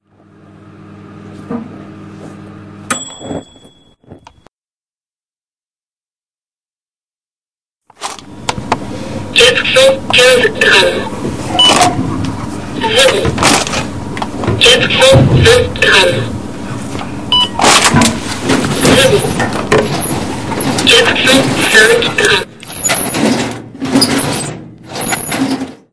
IDES-FR-postcard-lucas, kamar et anis
a peek through the keyhole at IDES